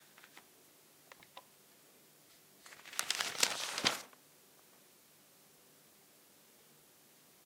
Newspaper On Table
The sound of a newspaper being placed on a table.
magazine, news, crinkle, newspaper, table, paper